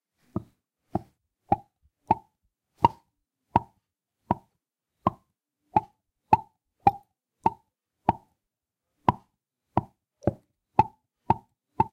While I was eating one day, something really weird happened at the right hand joint of my jaw. Every bite was accompanied by a pain and a click. This is a recording of the sound (open mouth in front of mics).One or two coincided with the clock ticking. Fortunately the pain and clicking have gone now.